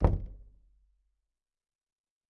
Door Knock - 44
Knocking, tapping, and hitting closed wooden door. Recorded on Zoom ZH1, denoised with iZotope RX.
bang, closed, door, hit, knock, percussion, percussive, tap, wood, wooden